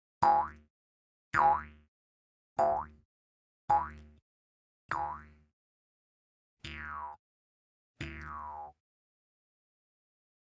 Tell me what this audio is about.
BOINGS Jews Harp
"Boing" effects created with a jews harp, recorded with a Rode NT1A condenser mic
spring, jaw-harp, jews-harp, boing